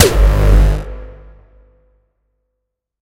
Professional Kick
This is a Kick i made with alot of fx and distortation!
Distortion, Hardstyle, Kick, Professional, Rawstyle